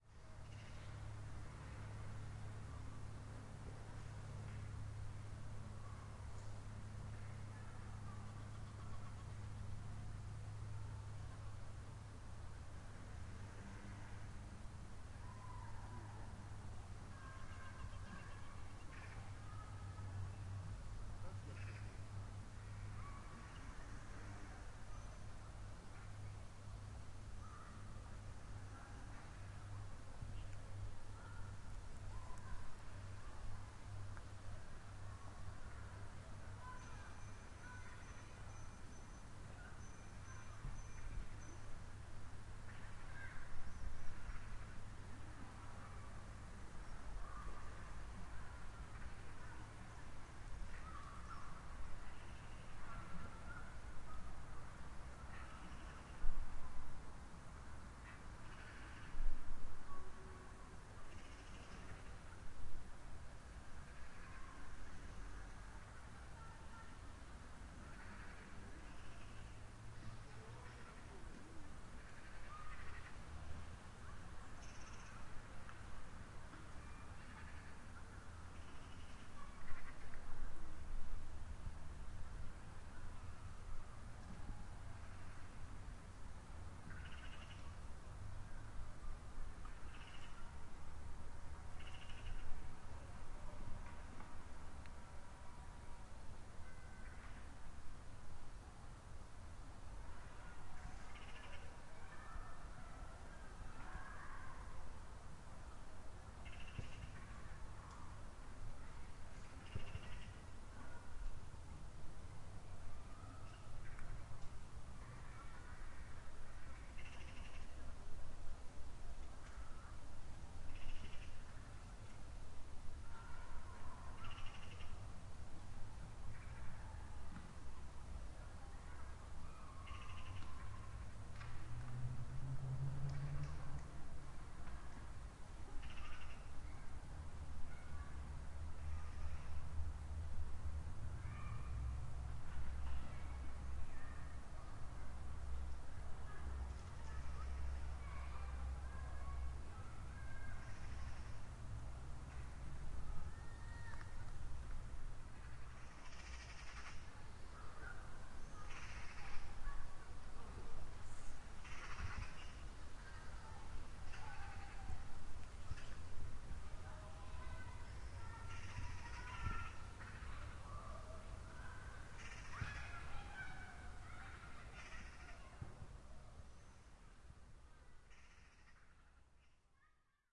park pleasure ground birds in the distance childrens in the distance
birds, ground, pleasure, distance, park